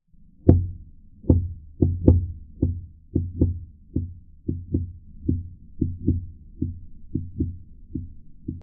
Deep Drums
DRUM, GARCIA, MUS153